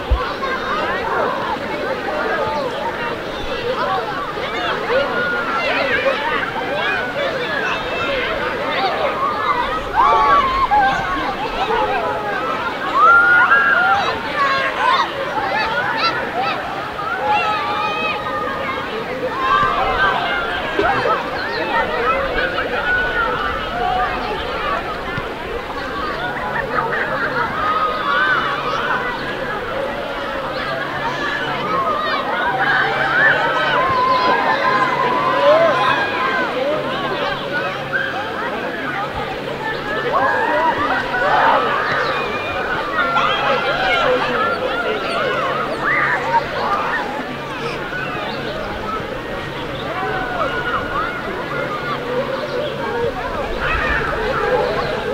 Families Playing At The Beach With Children
R.B. Winter State Park, the beach was packed, June 08, 2014. Sound captured across the lake of families having fun.